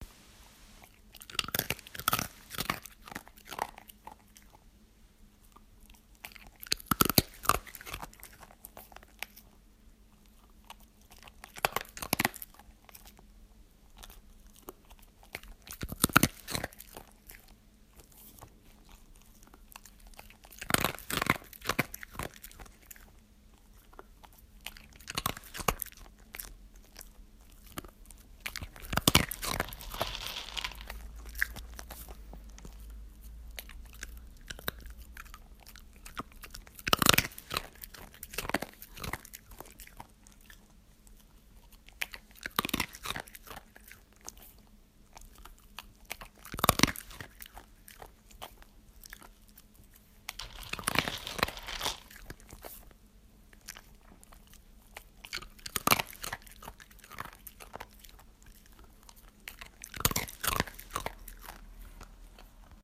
Dog Eating Individual Treats - Crunch Crunch Crunch
Recorded on an iPhone right next to his mouth, but excellent quality.
Nice quiet background noise too.
Great chomping noise.....Nom nom nom nom.
treats, crunch, dog, chomp, eating, individual